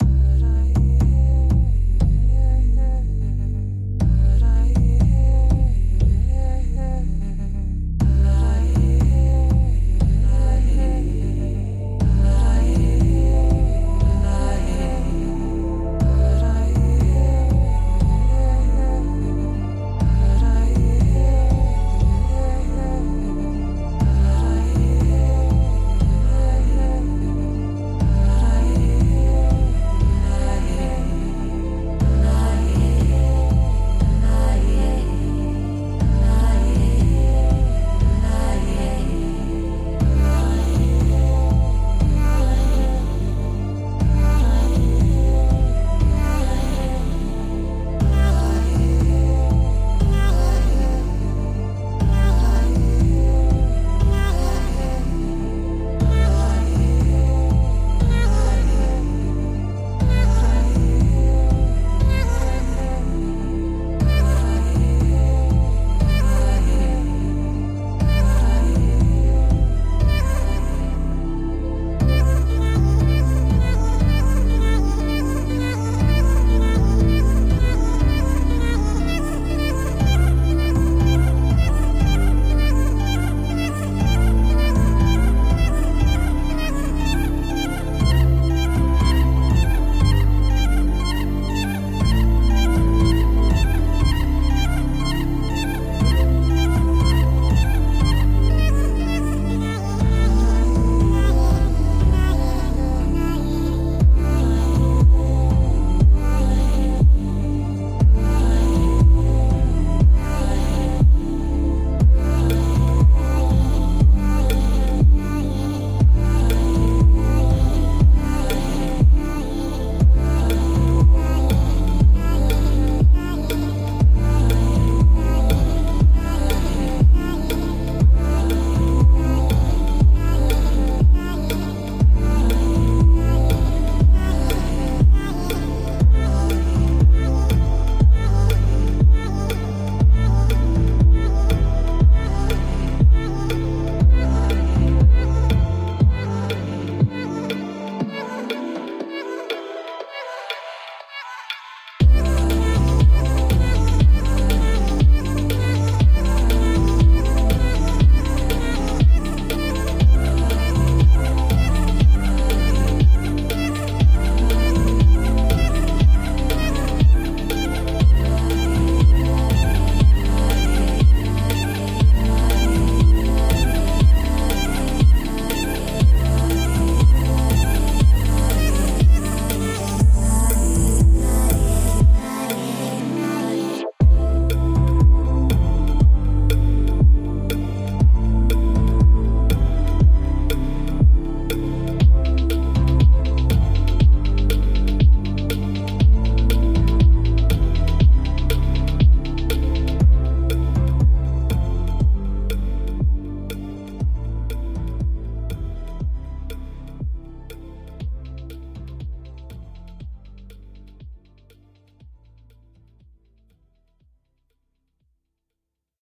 Experimental Electronica 001
Experimental electronica.
Feedback is appreciated as well, thankk youu :)
Artist: Sanedove